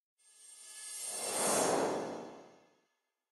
Short Flashback Transition
A short, whooshy sound for use during a cinematic transition to a flashback or something. I made it for my short film and it was very useful, so I thought I'd share for free.
flashback, effect, whoosh, woosh, adobe-audition, transition, cymbal, fade, free